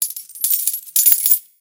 COin Drop Sound
Indian 10 rupees coins Drop on coins
10-coins, Coins-Drop, Coins-on-the-Flor